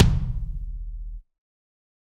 Kick Of God Wet 025
set, kit, pack